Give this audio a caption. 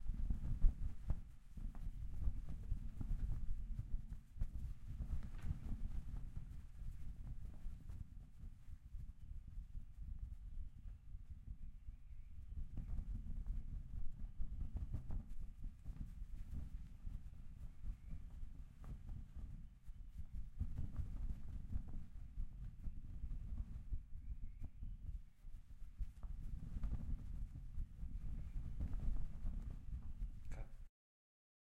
Windy Cloth
Friction, OWI, Wind, cloth